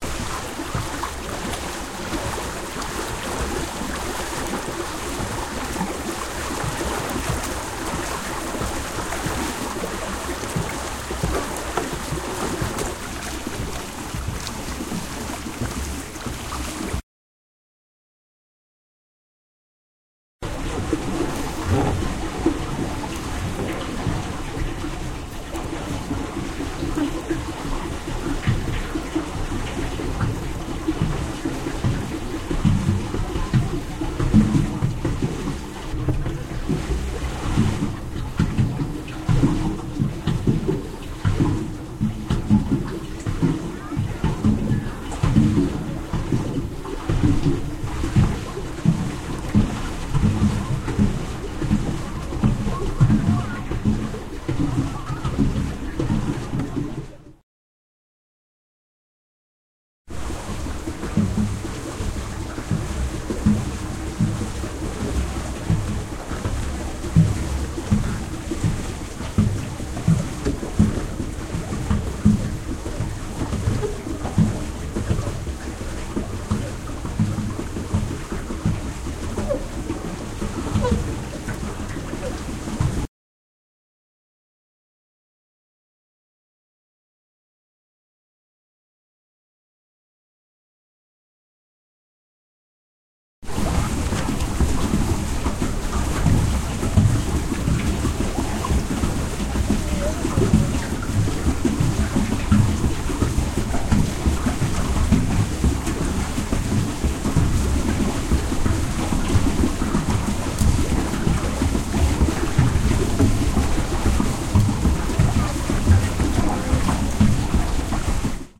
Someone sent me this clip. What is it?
Paddle boat on water